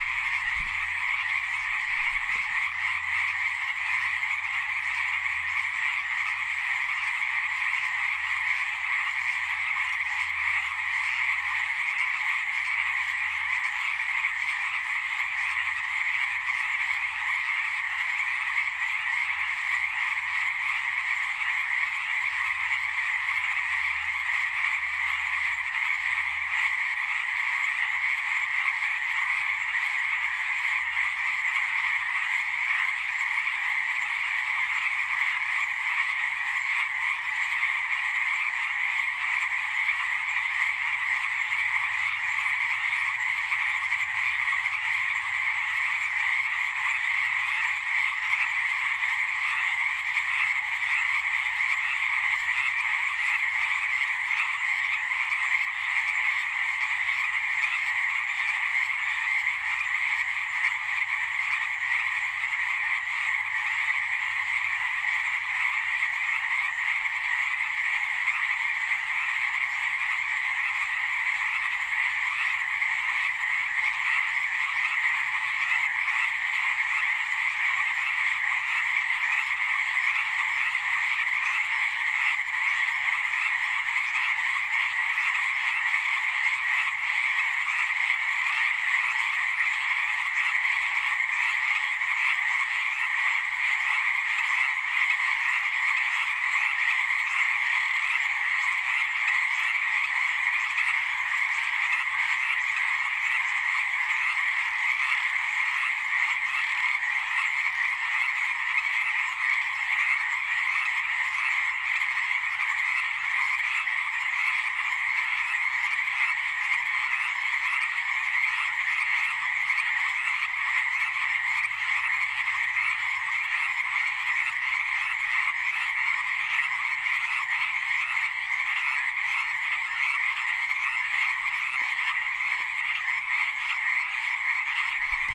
Frogs at night croaking in marsh. Recorded in Vernon BC 5/4/17 on a Zoom H6.